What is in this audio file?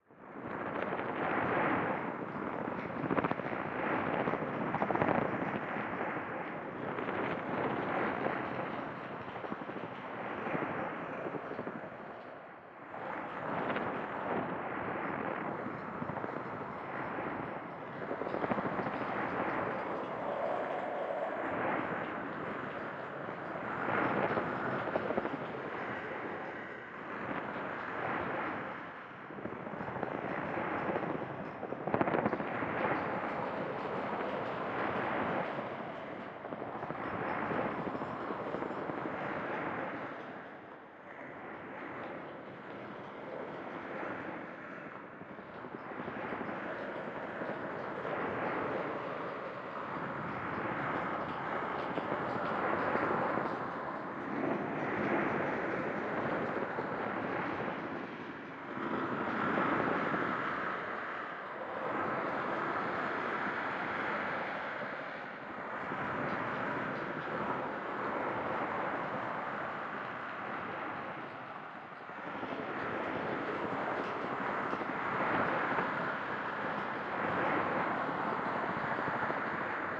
08 - frequency shifter (-1.5kHz)
7th step of sound design in Ableton. Added Ableton's frequency shifter.
freaky, weird